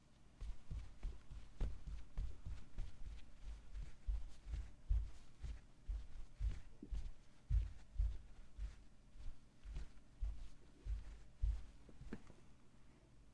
Schritte SockenTeppich
Foley of socks on carpet.
footsteps, carpet